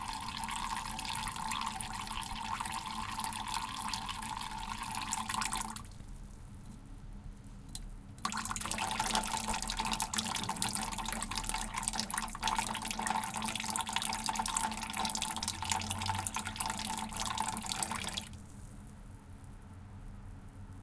Small water leak from a tap into a bowl of water. Bubbles can be heard at the end.
Recorded on a Zoom H4n recorder.